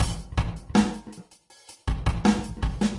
Simple 80bpm drum loop with room reverb.